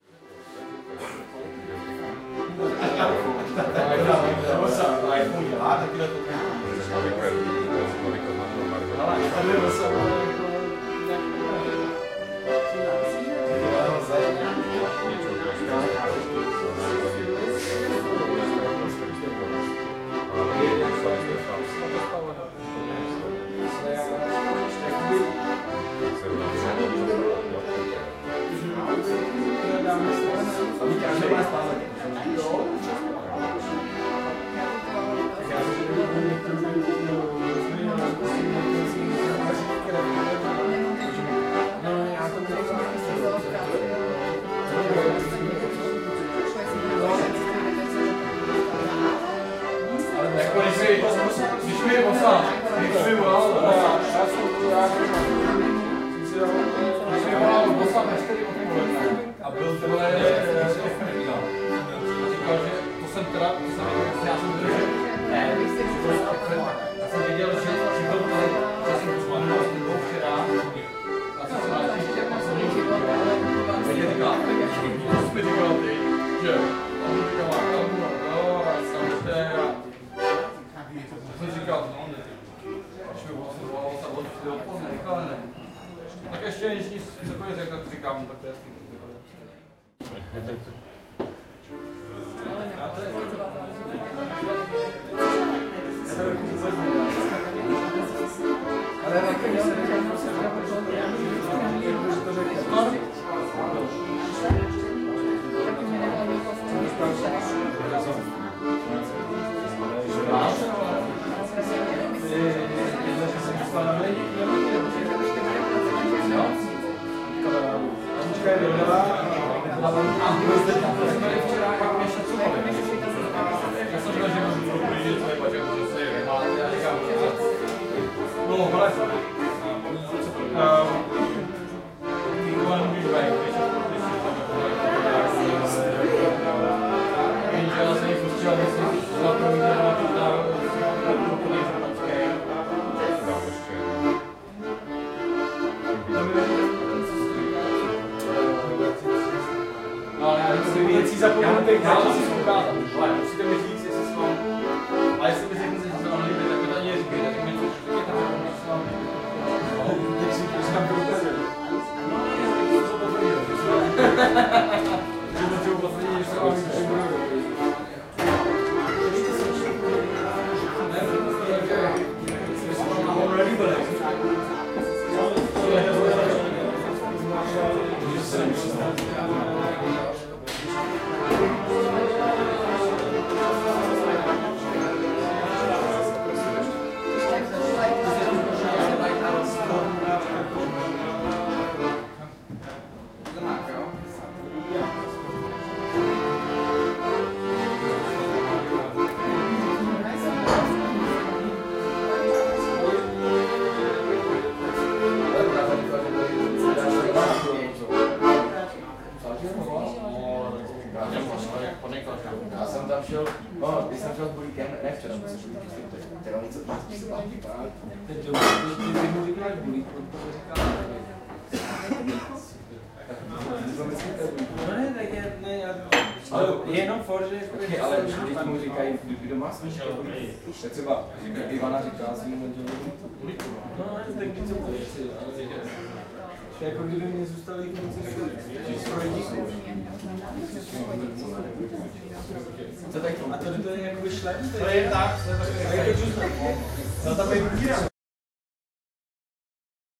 Prague, Staro Mesto, City Center part.8 - Country roads, bar Svejk
Old guy playing "Country roads" in bar Svejk/Schweik in city center of Prague, Czech Republic on 21th of December 2013. Recorded with Zoom H-1.
accordeon, ambience, ambient, atmosphere, bar, city, country, crowd, field-recording, live, music, people, prag, prague, praha, restourant, roads, schweik, svejk